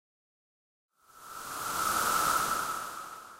Wind Short
Windy Ambiance Sound
Edited, Free, Mastered